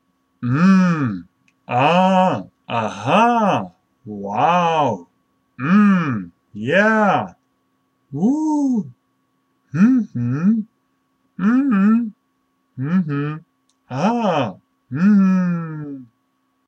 ooh aah
several confirmational ooh's and aah's, male voice
Recorded in my bedroom using a logitech Z270 webcam and audacity software during the 21st of october 2014
aah, ah, oh, ooh, voice, yeah